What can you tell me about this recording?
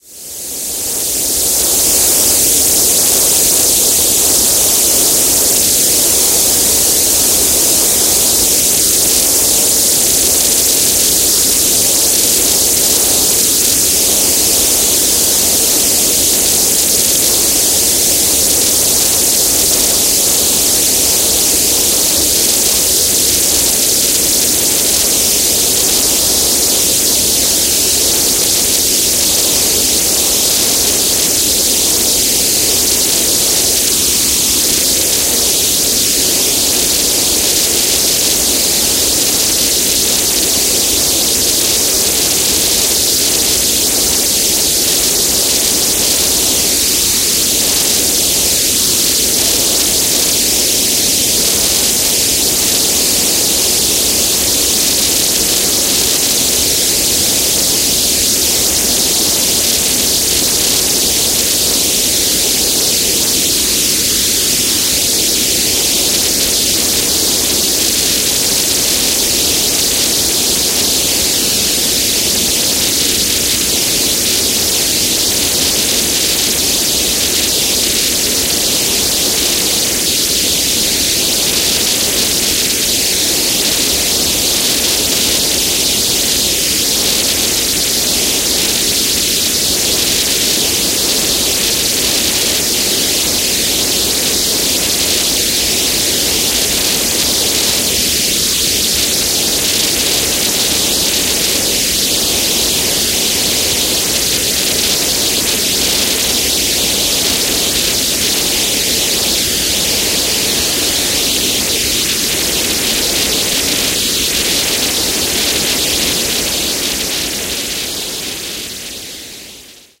This sample is part of the “Wind” sample pack. Created using Reaktor from Native Instruments. This is a slowly evolving wind from outer space. More like a drone.